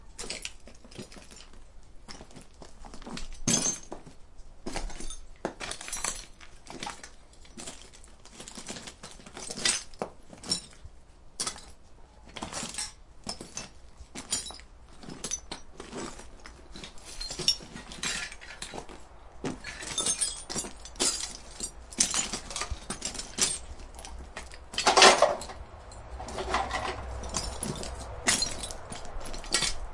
recording of someone walking on the floor of a shed covered with thousands of old car parts, nuts, bolts etc.
recorded at kyrkö mosse car graveyard, in the forest near ryd, sweden
car, field-recording, metal, metallic, parts, step, walk